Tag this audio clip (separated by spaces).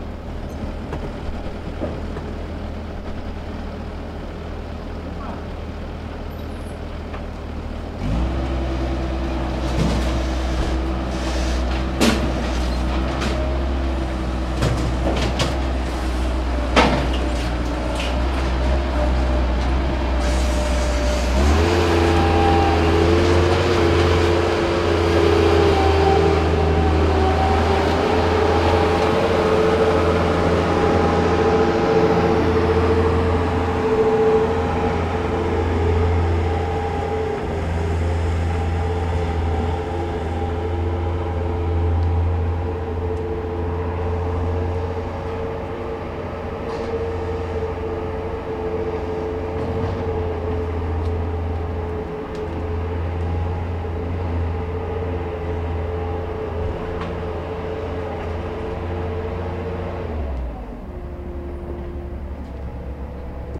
bobcat construction drive engine motor work